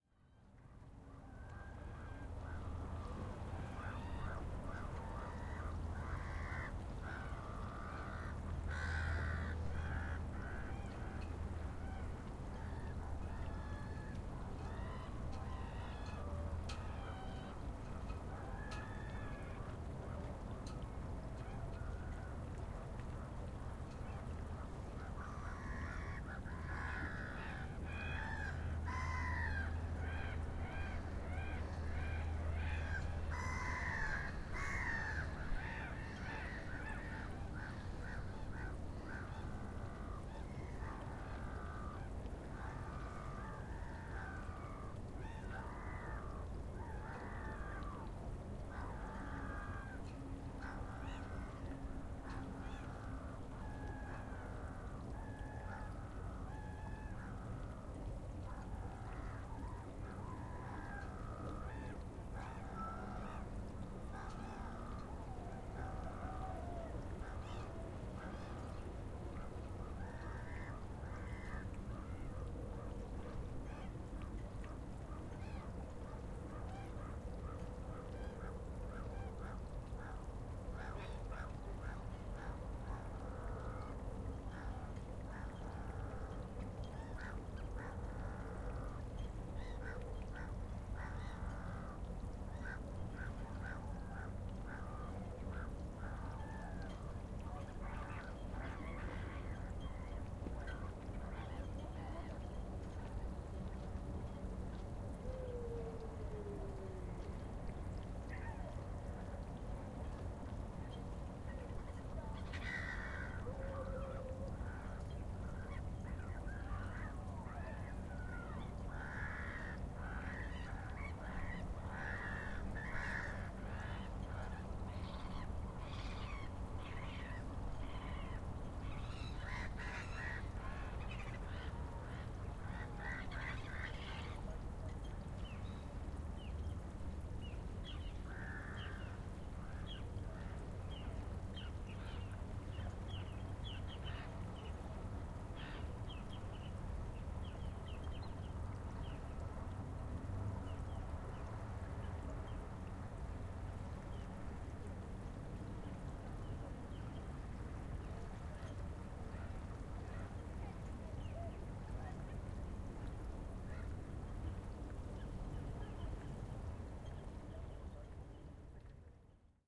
Hamble River in the summer 4
Around midday on a hot, 30 deg C Sunday in the summer. Four recordings,
the first recording starting next to the village where there are lots of people, children playing and boats. Each subsequent recording is further from human activity, so there are more birds around.
Zoom H1, internal capsules
birds, boat, boats, children, engine, ferry, gulls, hamble, human, mix, nature, people, river, riverside, summer, swimming, uk, warsash, water, waterway, waves